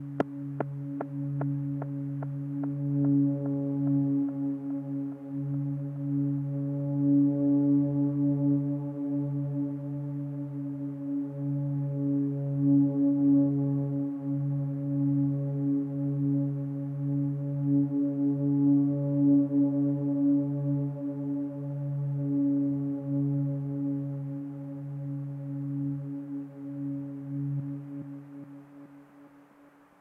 DRONE AND SPACE SOUNDS STYLOPHONE GEN X 07
Different sounds I got with different guitar pedals plugged in.
Gear used--
Soundsource:
SYLOPHONE GEX X 1
Pedals:
EHX Attack Decay
Zvex Lofi Junkie
Earthquaker Devices Space Spiral
EHX Nano POG
Recording:
Yamaha MG12/4
Focusrite Scarlet 2i2